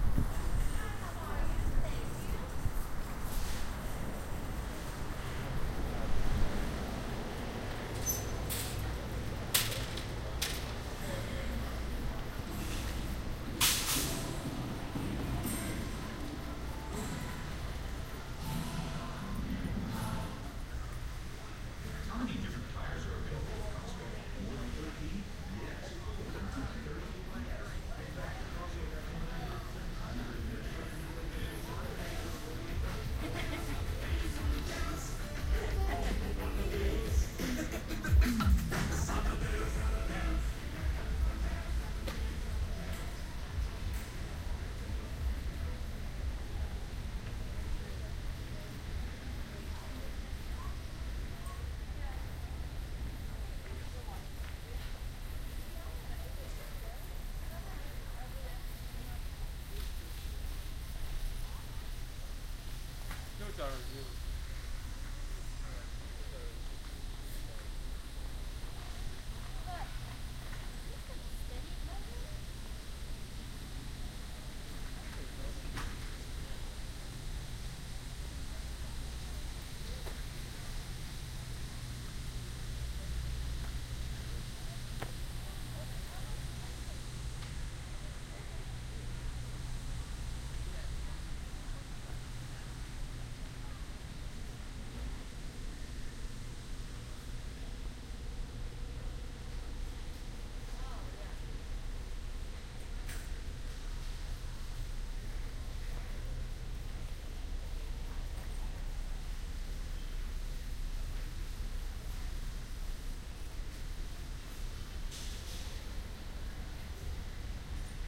costco sounds
So I decided to go to costco and record. The recording only goes on for a couple minutes since the store wasn't too busy. You will hear the greater meeting me at the door and checking the card.
sound chain: sound pro binaural mics--h120
austin, binaural, field-recording, greater, store, walking